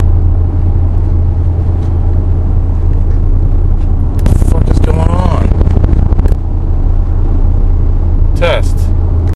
SonyECMDS70PWS digitaldeath5
digital, field-recording, microphone, test, unprocessed